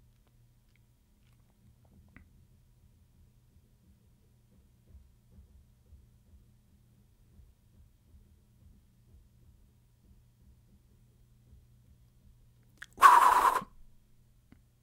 Sharp object flipping through the air